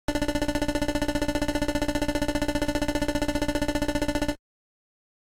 Text Scroll D-3 3 225
A repeated D in the third octave pluck sound in the pulse channel of Famitracker repeated to show text scrolling.